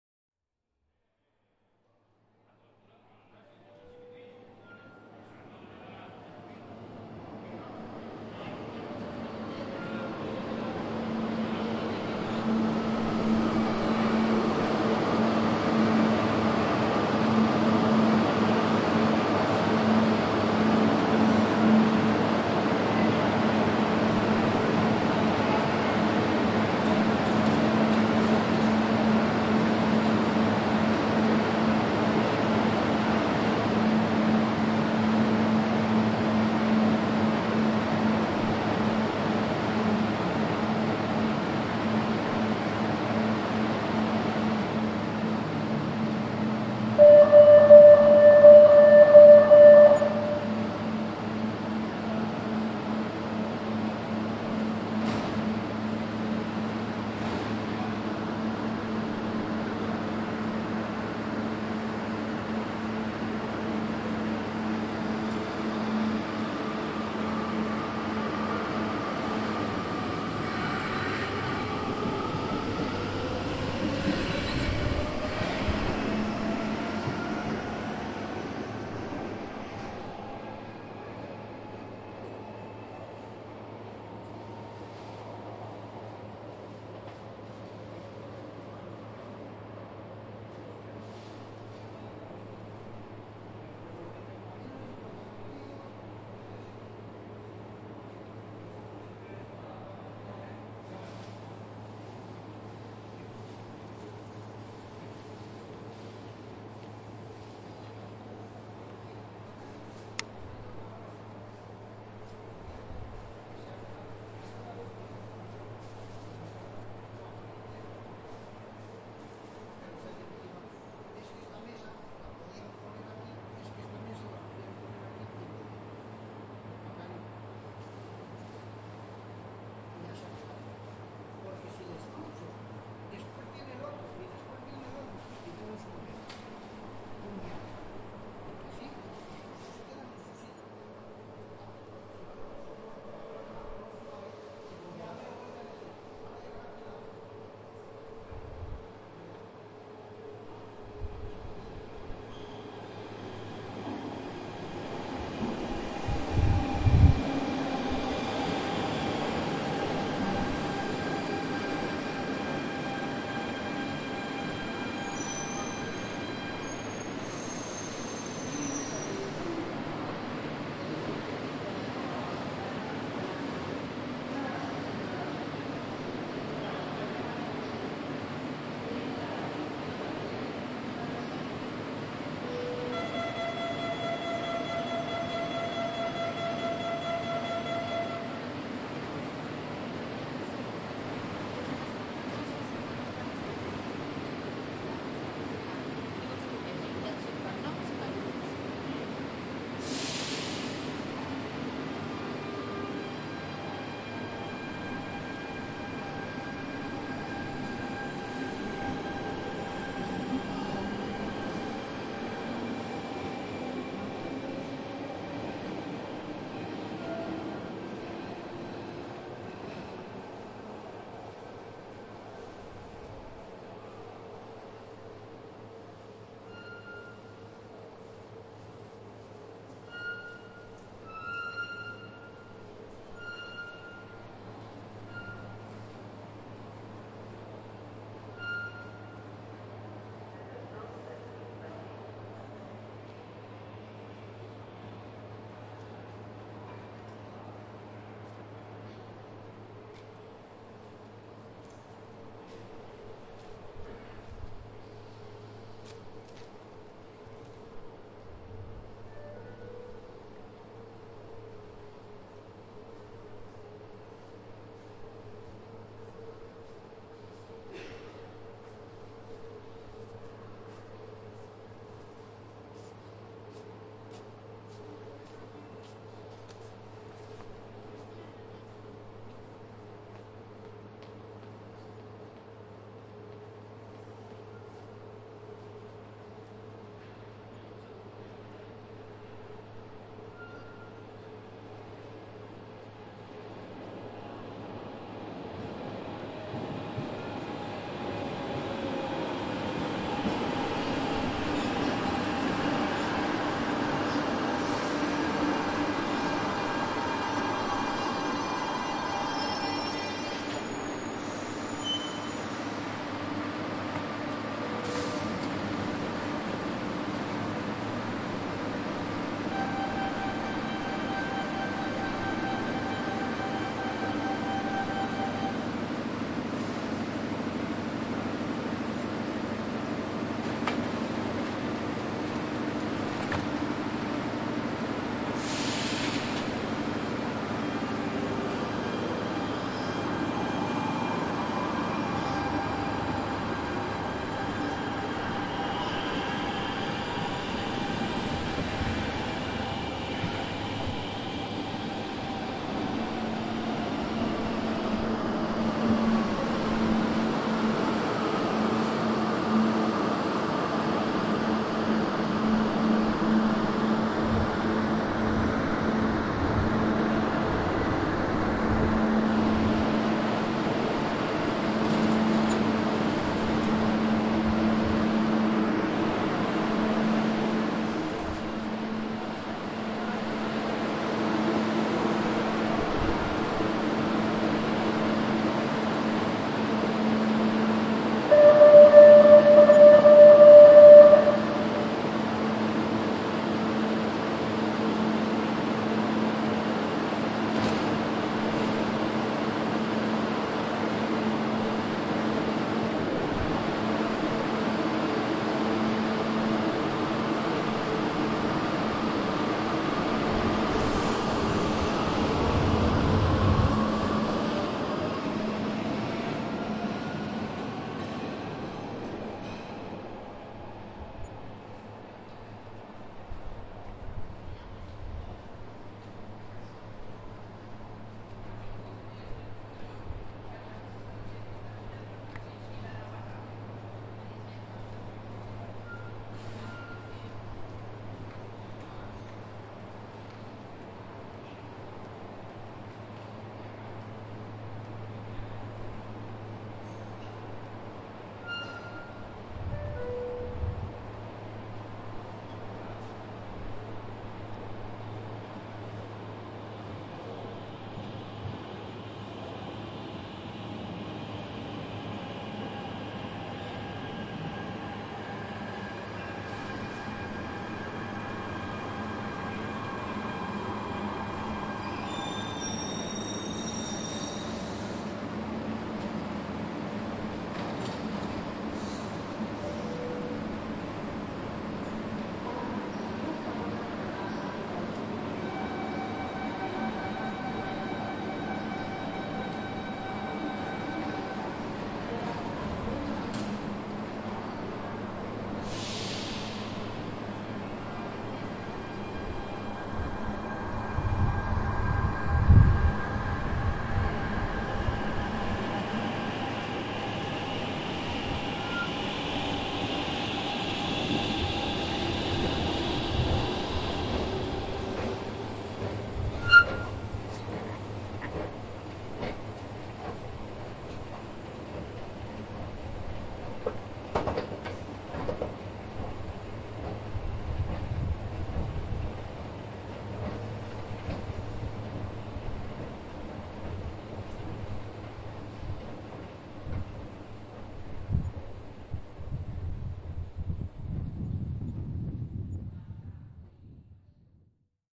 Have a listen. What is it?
Sant Andreu metro station 18.00h-12/12/13
Field-recording inside the metro station at Sant Andreu L1 (Barcelona) .
Train coming from booth directions.Recorded at the center track
Then, I up for the mechanical stairs